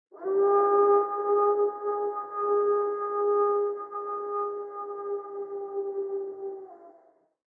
Wolf Howl
growl, howl, howling, wolf, wolves